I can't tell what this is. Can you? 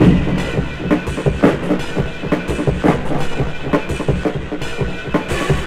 Delayed train-like lo-fi chemical drum loop